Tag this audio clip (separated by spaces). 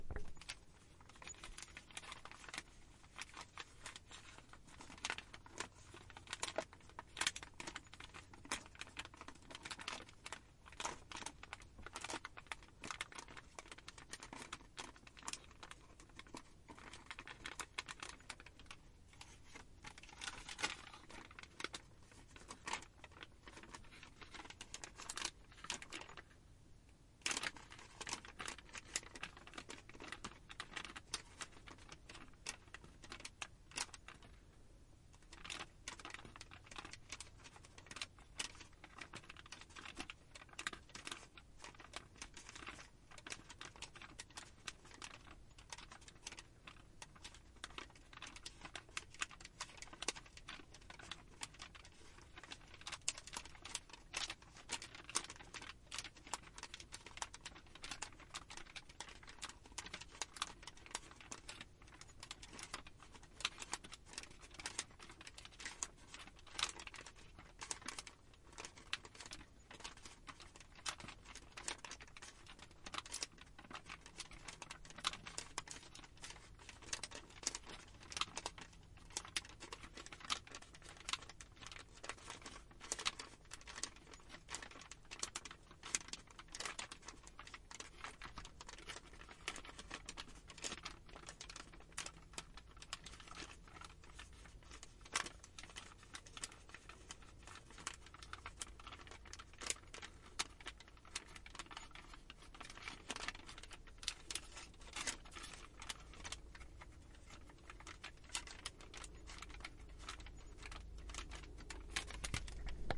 crumple field-recording paper